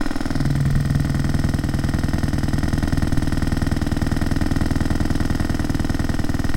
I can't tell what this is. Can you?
vibration machine idle
One of those hand held massage gun things running on idle.